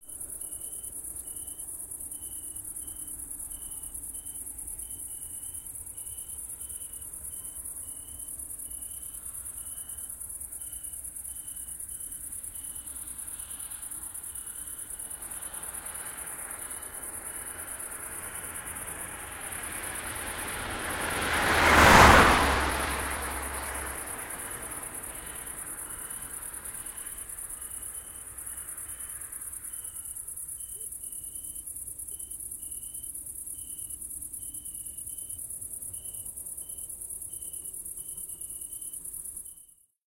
hereg hungary car passing by 2 20080712
Car passing by in front of the microphone. Recorded at a road near the village Héreg using Rode NT4 -> custom-built Green preamp -> M-Audio MicroTrack. Unprocessed.
car, cicades, crickets, hungary, night, passing-by, summer